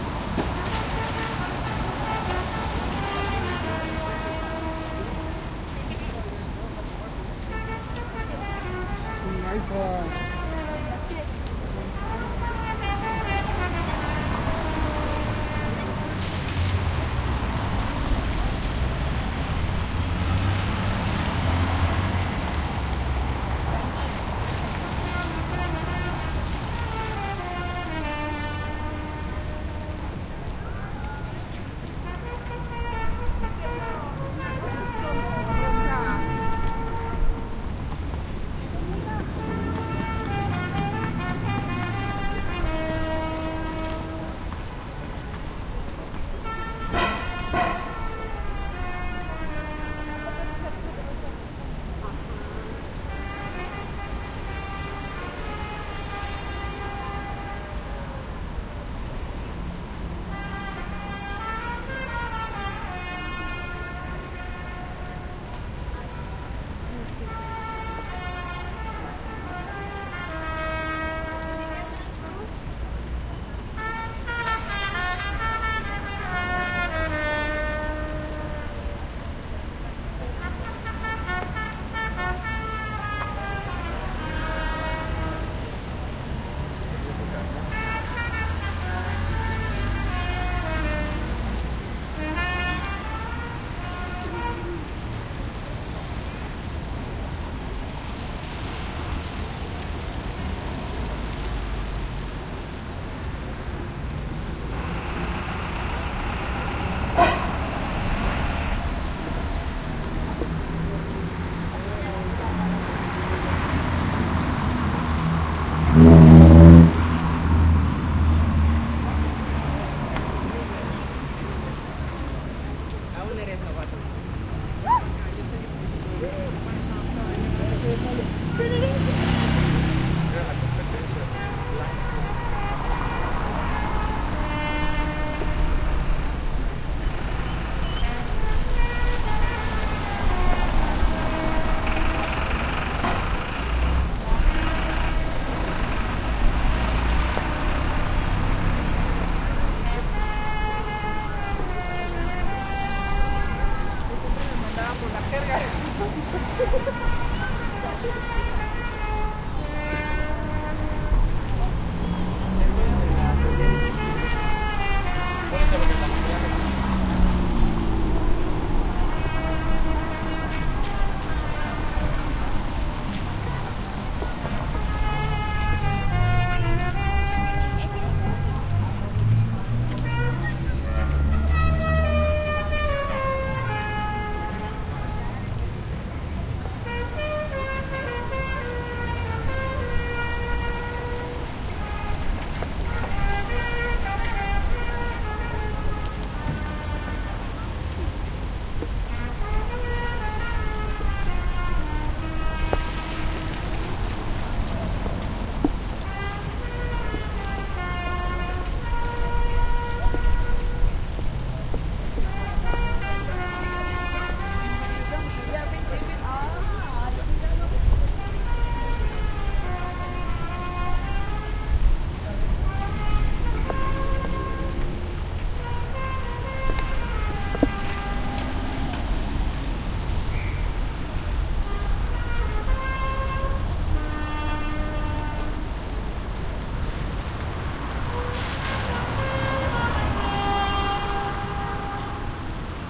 Trompetista Centro Historico
One trumpet player in a streets of Mexico city
Ambiental, Music, Musician, Street-music, Trumpet